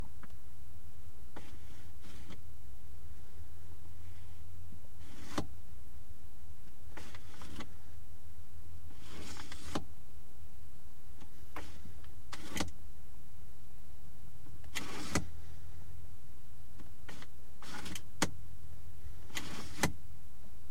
010-HVAC-ACSliderPassSeat
The Air Conditioning slider on a Mercedes Benz 190E, shot from the passenger seat with a Rode NT1a.
dial, dyno, fader, knob, photo, preview, process, recording, sessions, slider, switch, test